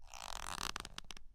Bending a leather belt.
bend, leather, belt